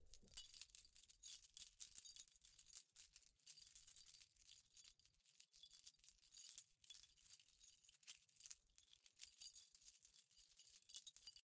Keys jangling from a key ring. Recorded with a Zoom H6 portable digital recorder, rifle microphone. Recorded in a small space.
field-recording, rifle